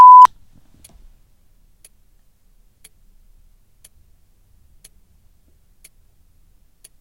Sony M10 150103 03 normalized
Self-noise test of line-level input of Sony M10, via Rode NT1a and Sound Devices Mixpre-D, of watch ticking. Designed as comparison with Tascam DR-70D and direct digital output (see separate files).
BEWARE OF LOUD 0db test tone at the beginning: turn volume down.
comparison, M10